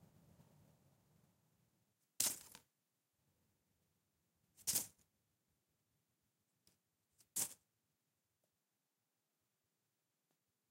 Drop Paper on Crumpled Tissues
Dropping paper on crumpled tissues in a garbage can.
I used it to make the sound of a paper dropped on grass.
garbage, grass, drop, Paper